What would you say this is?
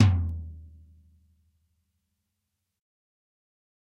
Dirty Tony's Tom 14'' 047
This is the Dirty Tony's Tom 14''. He recorded it at Johnny's studio, the only studio with a hole in the wall! It has been recorded with four mics, and this is the mix of all!
drumset, drum, heavy, raw, punk, realistic, 14x10, pack, tom, metal, 14, real